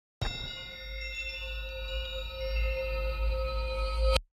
Magic Aura Shimmer
chimes, aura, wind, magic, wand, spell, shimmer, heal